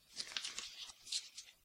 Money being handled.